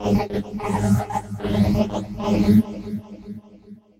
THE REAL VIRUS 11 - VOCOLOOPY - G#2
A rhythmic loop with vocal synth artifacts. All done on my Virus TI. Sequencing done within Cubase 5, audio editing within Wavelab 6.
vocoded, loop